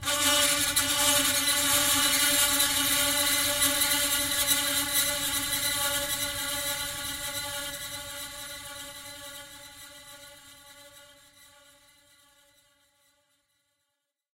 dissapearing rusted terradactyl
recordings of a grand piano, undergoing abuse with dry ice on the strings
screech, piano, scratch, abuse, torture, ice, dry